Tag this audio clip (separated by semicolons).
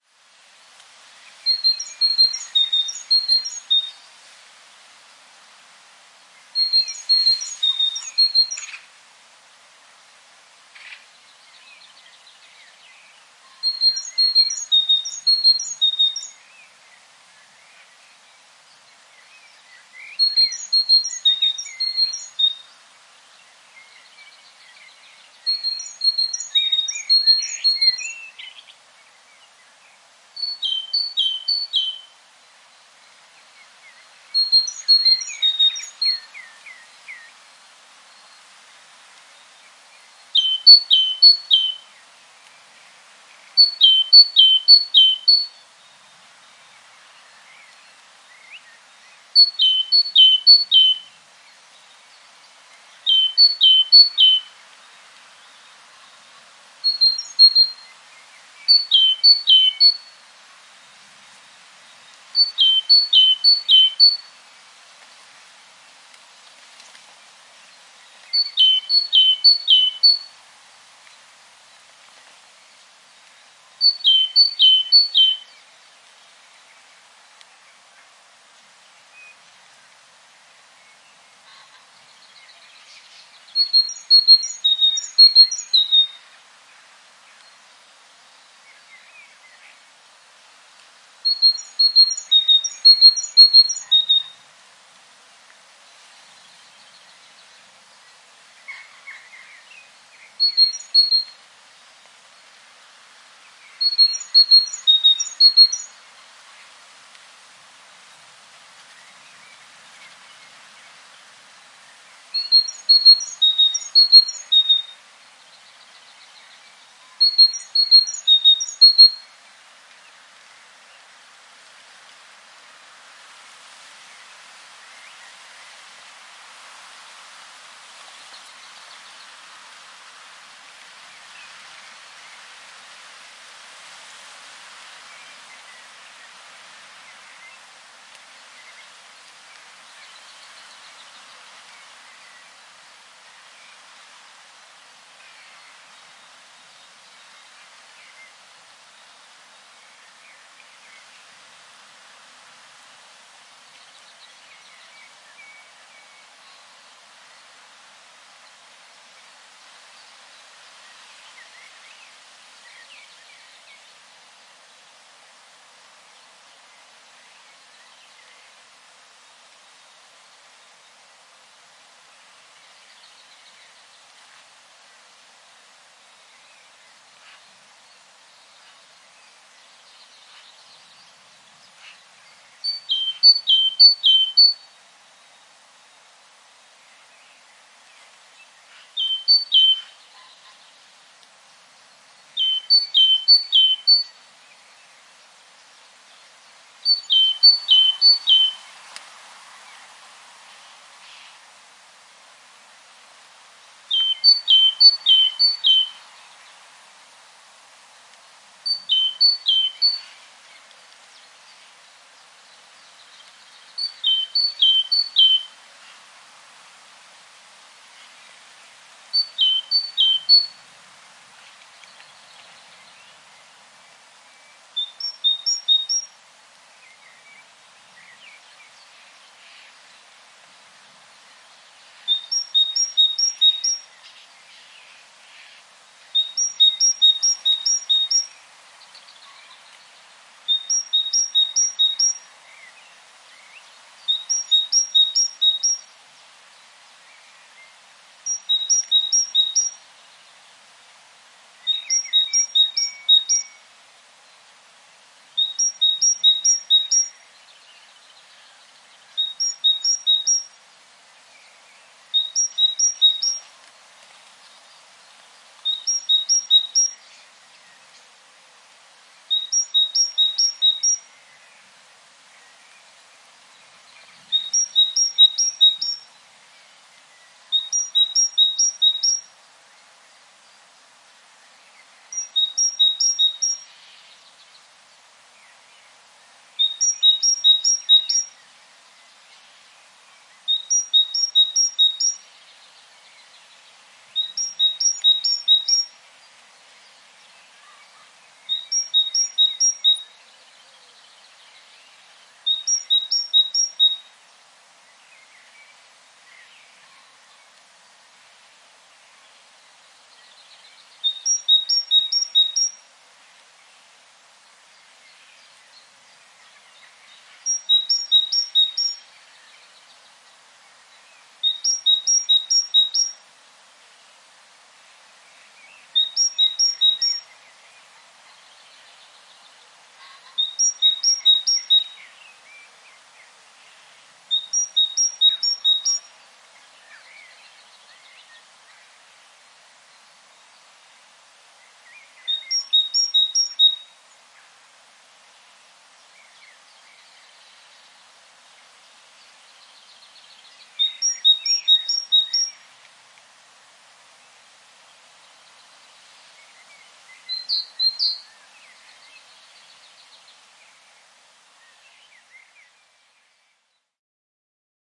atmosphere; Bird; Great; kohlmeise; Tit; twittering; Vogel; Wald; zwitschern